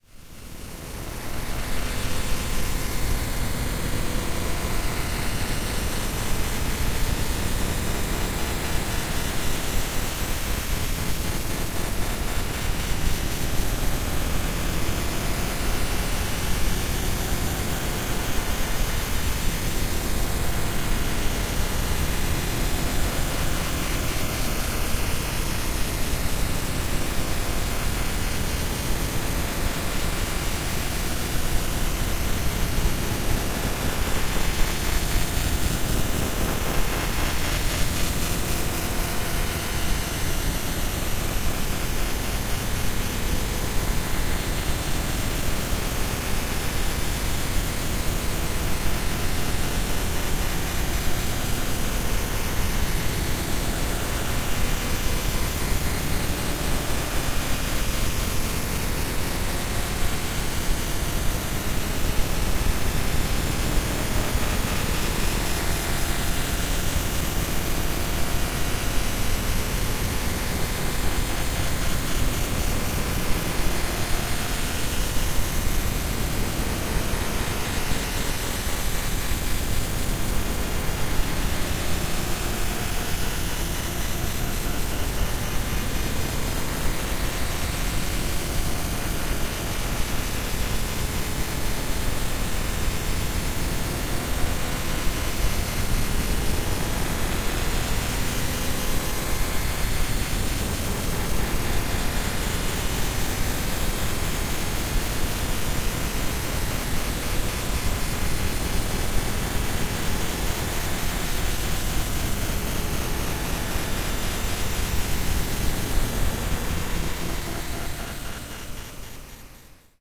Noise Garden 12
1.This sample is part of the "Noise Garden" sample pack. 2 minutes of pure ambient droning noisescape. Horror noise.
drone, effect, noise, reaktor, soundscape